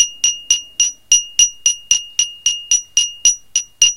This is a recording from banging a key against a glass with some water.